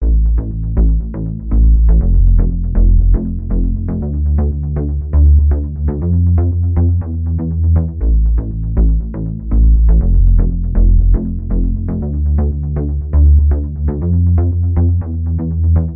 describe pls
GLIESE BASS
Dub, LFO, Wobbles, bass, dubstep, edm, effect, free-bass, low, sub, wobble